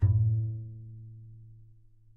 Double Bass - A2 - pizzicato
Part of the Good-sounds dataset of monophonic instrumental sounds.
instrument::double bass
note::A
octave::2
midi note::45
good-sounds-id::8689
multisample, A2, pizzicato, double-bass, neumann-U87, single-note, good-sounds